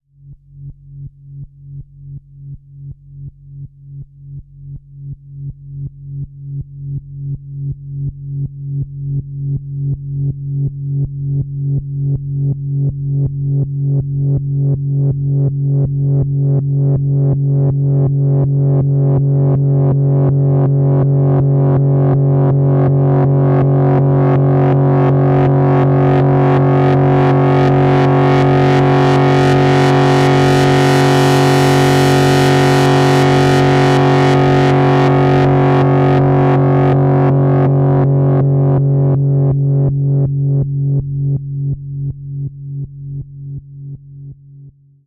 Making weird sounds on a modular synthesizer.
analog, glitch, modular, noise, synth, synth-library, synthesizer, weird